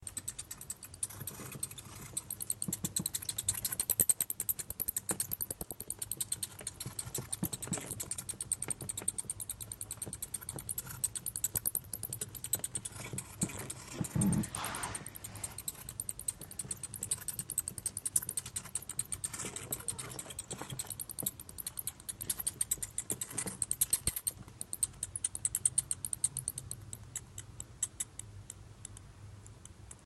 Bat chirp (close-up)
Bat stuck in a heating duct. Recorded with an iPhone 5s a couple of inches away. (The bat eventually got unstuck and flew out unharmed.)
bats, close, squeak